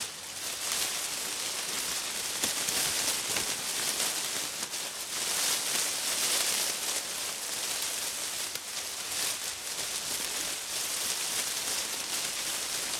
grass rustling uncut
foliage, grass, rustling